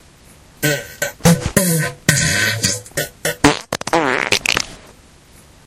massive mumbling fart
snore, weird, gas, space, car, aliens, ship, flatulation, beat, race, laser, flatulence, computer, frog, noise, poot, fart